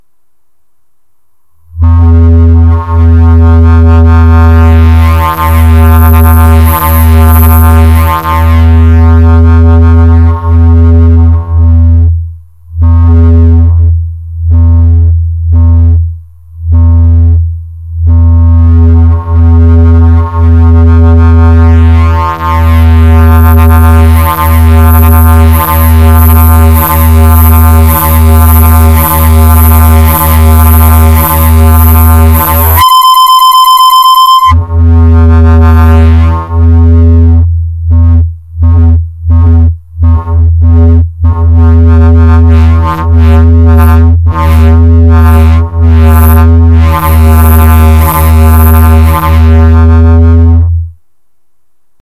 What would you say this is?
it is a very strange sound:) made by my reloop rmx-30 DJ mixer's and Sb live soundcard's bug! there could be a malfunction and when i used the gain potmeter the sound from nowhere (the cable, the mixer, the soundcard, don't know..) became mad. it is travelling on freaky frequencies which makes your ear bad. it is worth to listen:) pure hardware sound
digital, experimental, fx, horror, noise, sound-effect